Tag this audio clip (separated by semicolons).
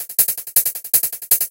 160bpm; hat; hats; loop; rhythm